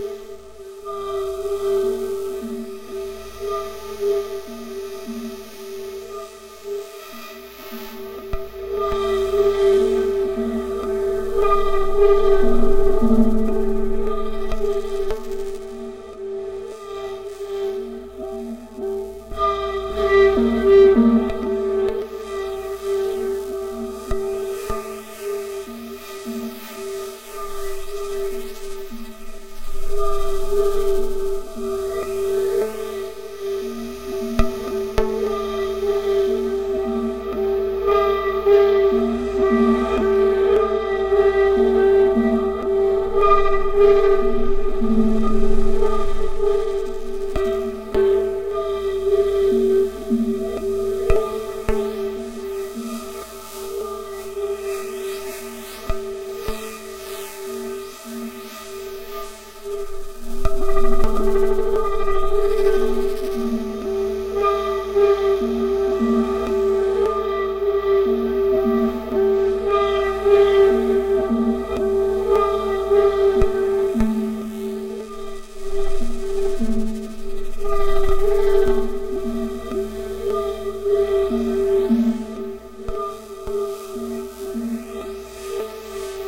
a collection of sinister, granular synthesized sounds, designed to be used in a cinematic way.